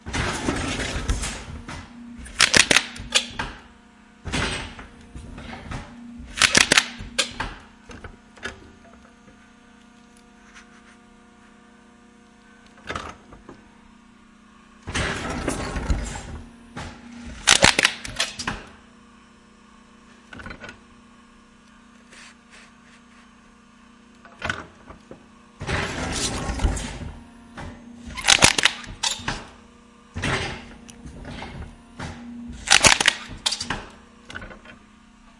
machine hydraulic metal cutter close crunch1
close, crunch, cutter, hydraulic, machine, metal